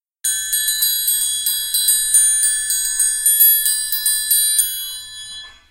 Triangle played by me for a song in the studio.
rhythm, triangle